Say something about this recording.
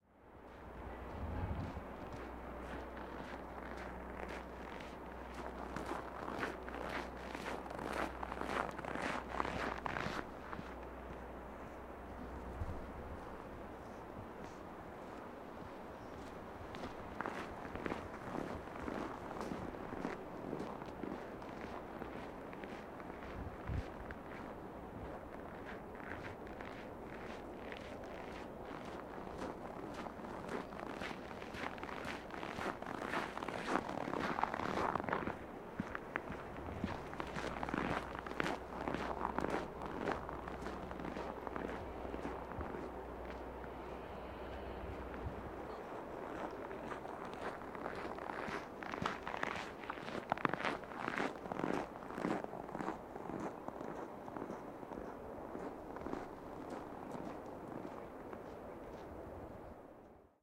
footsteps snow walking to walking away
Close recording of a single person's footsteps in the snow on a winter's day. The snow was dry, so the sound is crunchy. Person is walking towards and away from microphone. Recorded using a Neumann KMR 81i, sound devices 744 T.